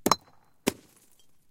rock thrown off steep rocky cliff near Iron lakes just south of yosemite.
throw, rock